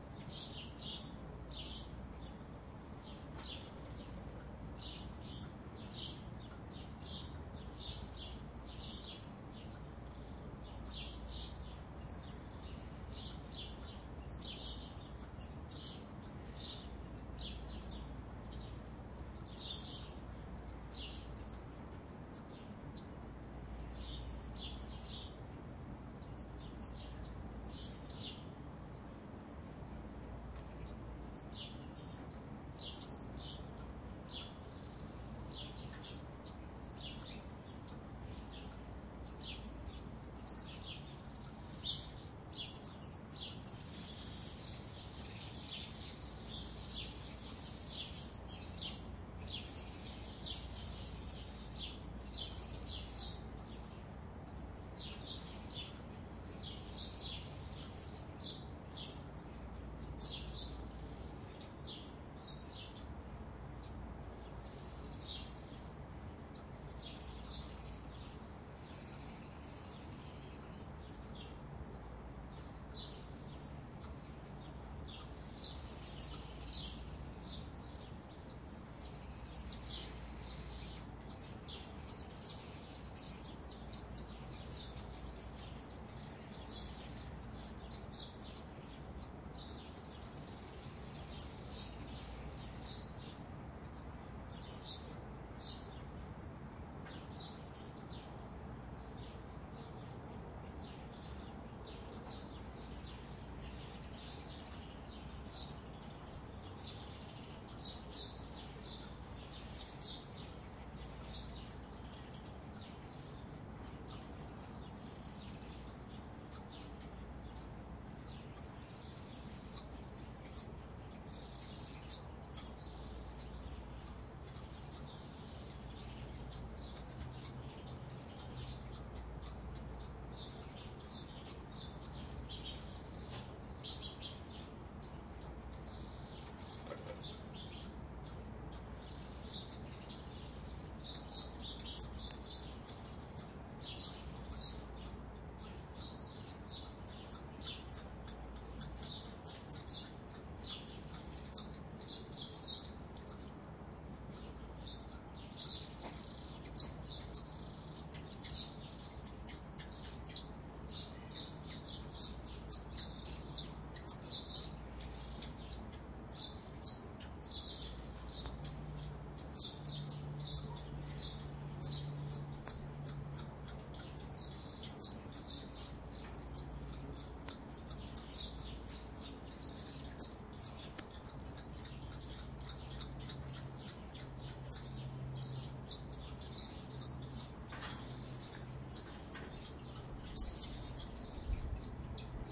Recorded at the Dallas Zoo. A long recording of a pair of Golden Lion Tamarins calling. There are also some sparrows chirping in the background.
tamarin, sparrows, zoo, monkey, primate, field-recording